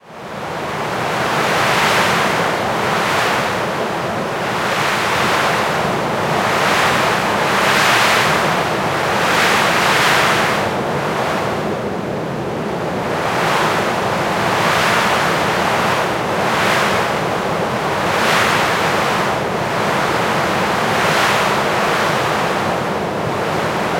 CP Whipping Wind Storm Thin
These are the primary, high pitched wind gusts from a wind storm. This was produced in a virtual synth.